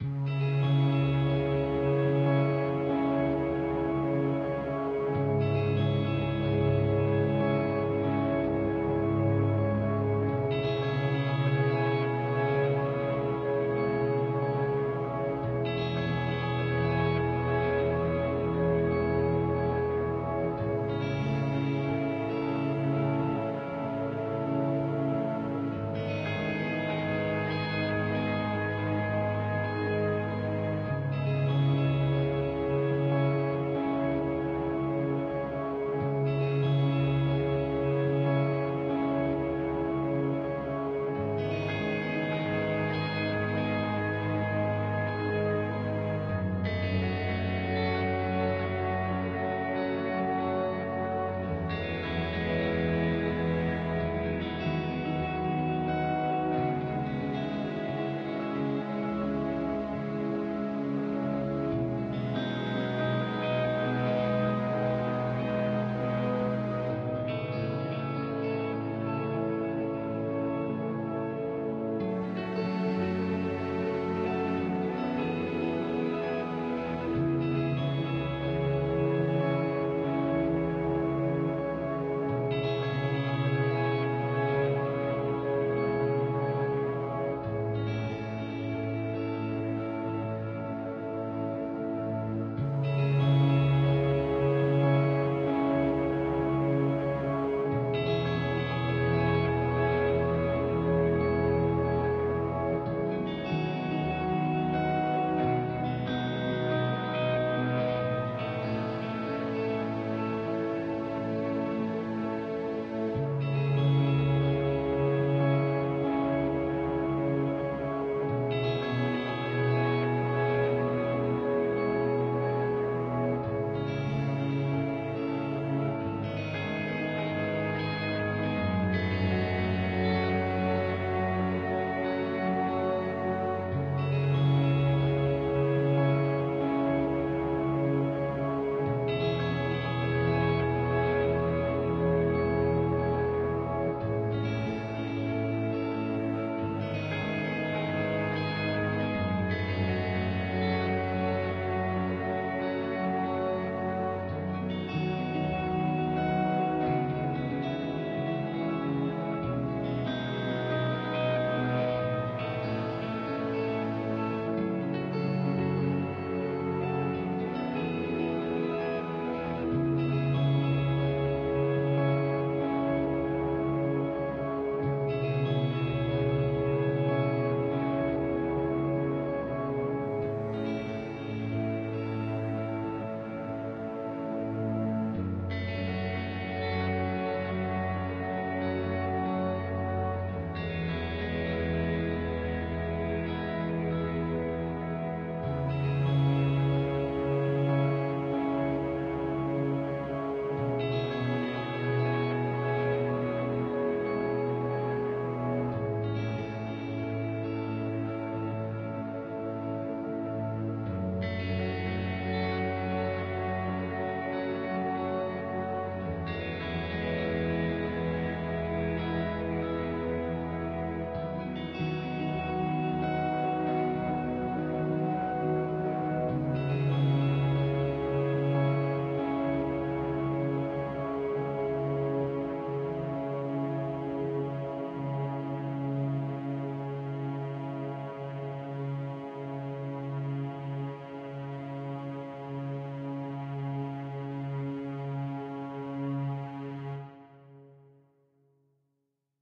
mo Opacity complete
warm harmonic chord progressions and Cello sound
enjoy!